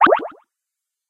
Beep created in Logic Pro, has a bit of a 'plastic' or 'rubber' feel to it.
short, beeps, sfx, gamesounds, sound-design, sounddesign